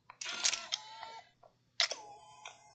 compact camera extend and retract
The sound of a compact digital camera being extended when turned off, then contracted when turned off.
camera, compact, digital, extend, machine, mechanical, nikon, whirr